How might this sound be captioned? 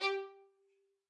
One-shot from Versilian Studios Chamber Orchestra 2: Community Edition sampling project.
Instrument family: Strings
Instrument: Solo Violin
Articulation: spiccato
Note: F#4
Midi note: 67
Midi velocity (center): 95
Room type: Livingroom
Microphone: 2x Rode NT1-A spaced pair
Performer: Lily Lyons
midi-note-67, solo-violin, spiccato, single-note, vsco-2, violin, strings, midi-velocity-95, fsharp4, multisample